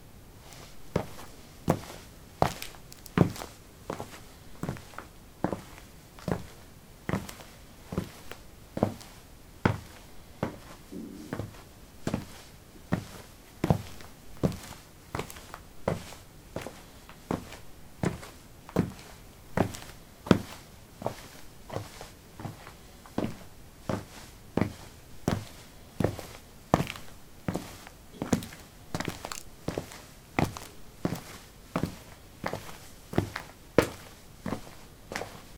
concrete 13a sportshoes walk
Walking on concrete: sport shoes. Recorded with a ZOOM H2 in a basement of a house, normalized with Audacity.
footsteps, shoes, footstep, steps, walk, walking, concrete